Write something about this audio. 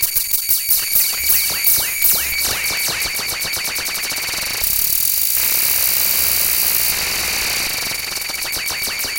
FLoWerS Viral Denial Loop 008
A few very awkward loops made with a VST called Thingumajig. Not sure if it's on kvr or not, I got it from a different site, I forgot what though, if you find it please link to it!
arrythmic, awkward, loop, noise, strange, weird